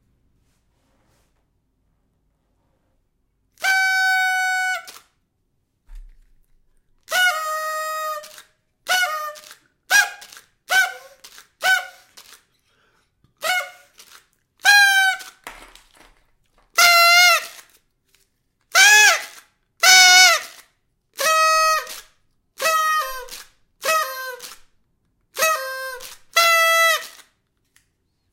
spanish party noisemaker
A whistle made of paper that unrolls when you blow and then it rolls back in. It is used in parties. In Spain we call it "matasuegras" (literally, "mother-in-law killing device"). Recorded with a RODE NT-USB
blower, party